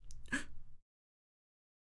33-Sonido Sorpresa Mujer
sonido de foley